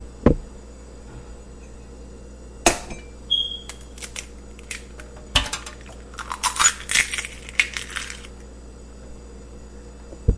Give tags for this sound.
crack
egg

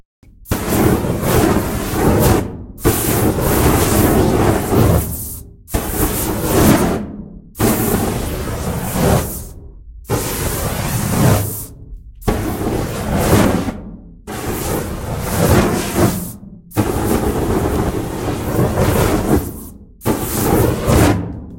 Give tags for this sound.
burst
can
spray
fire
reverb

